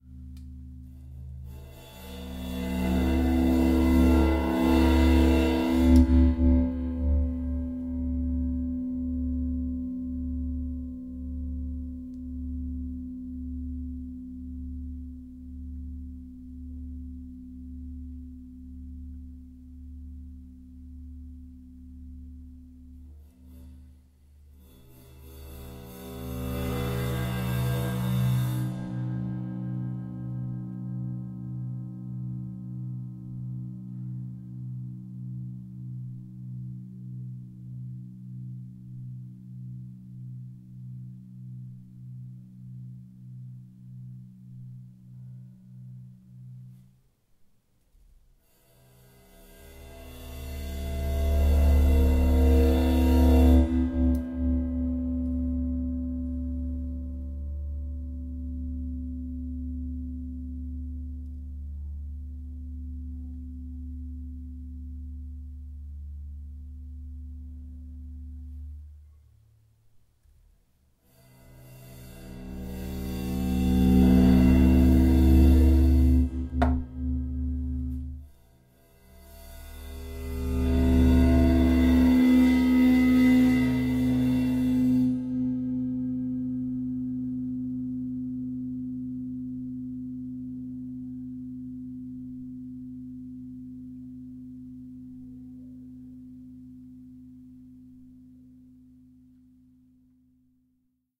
Cymbal Ride Bow 20in
Bowing a 20in ride cymbal up close along the side. Couple of different options within, most having some cool LFEs under the 100 Hz range. Recorded with a Zoom H4n. If you want more options with higher frequencies, check out the 'Cymbal Bow 18in' in the pack.